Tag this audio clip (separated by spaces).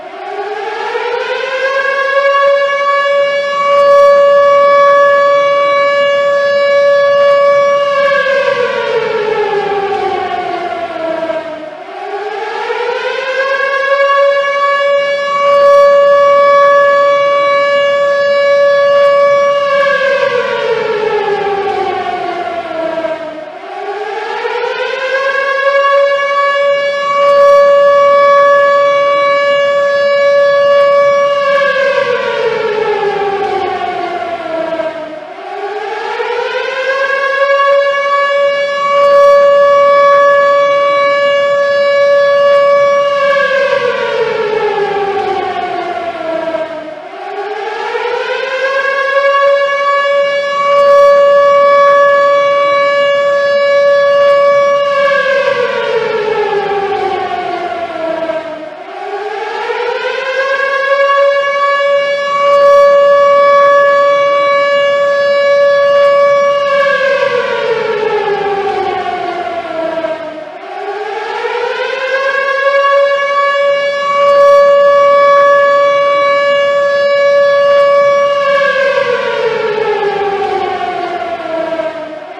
siren evacuate federal emergency warning field-recording